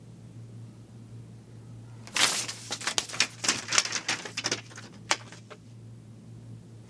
Cash Raining Down
A wad of 25 $20 bills being tossed in the air and falling to the floor.